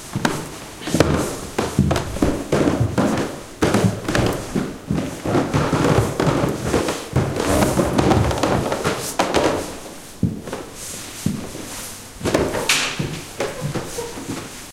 slow footsteps on wooden stairs. Olympus LS10, internal mics. Recorded inside Sala de Exposiciones Santa Ines, Seville (Spain)